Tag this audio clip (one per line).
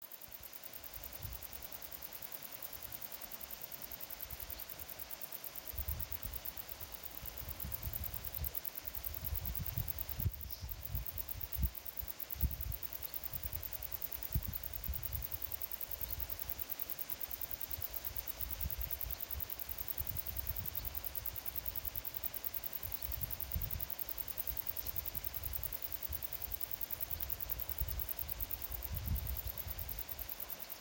cricket
crickets
field-recording
insects
mountain
nature
summer